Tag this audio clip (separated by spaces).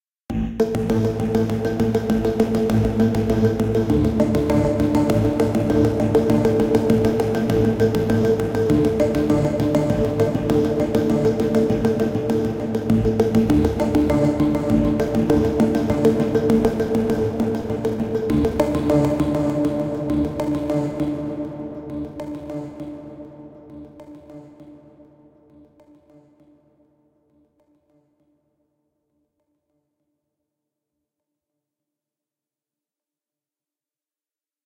electronic ambience deep Room ambient machine sci-fi soundscape futuristic hover pad energy spaceship bridge space atmosphere rumble sound-design drone fx impulsion noise effect drive engine dark starship emergency background future